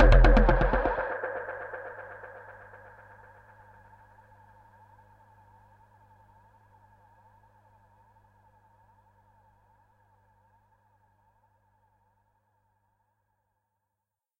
Nero Loop 23 - 120bpm
Distorted, Nero, 120bpm, Loop, Percussion